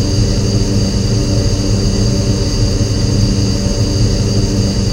Created using spectral freezing max patch. Some may have pops and clicks or audible looping but shouldn't be hard to fix.
Atmospheric, Background, Everlasting, Freeze, Perpetual, Sound-Effect, Soundscape, Still